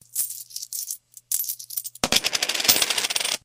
change on table
sorting coins in hand and then drop on the table
coin, table, coins, drop, field-recording, metal, roll, sort